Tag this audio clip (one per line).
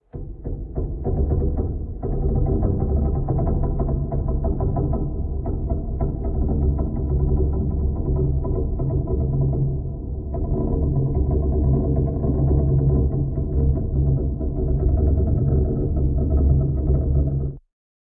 ambient
bass
bend
bending
bent
boat
cello
dark
deep
low
low-pitched
massive
medium-length
movement
moving
noise
rumble
ship
surface
underwater
wood